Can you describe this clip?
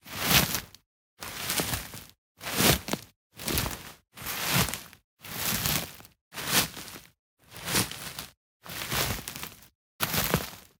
Some Fieldrecordings i did during my holidays in sweden
Its already edited. You only have to cut the samples on your own.
For professional Sounddesign/Foley just hit me up.